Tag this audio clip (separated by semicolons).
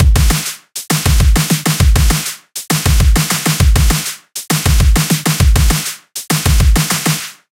drum; record; solo